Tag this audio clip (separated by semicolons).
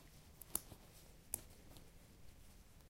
botton; click; clothing-and-accessories; snap-fasteners